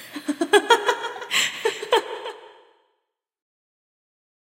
Female Evil Laugh 1
Female laughs with delay and reverb
Recordist Peter Brucker / recorded 4/16/2019 / condenser microphone / performer C. Tompkins